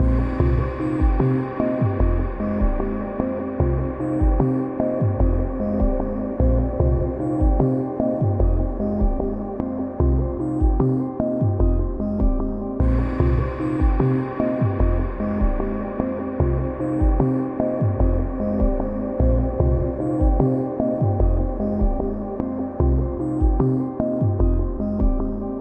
075 fm Ellberge part 2
electronic, gentle, harmonic, hypnotic, loop, pluck, processed